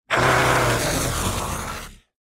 A monster voice
beast, beasts, creature, creatures, creepy, growl, growls, monster, scary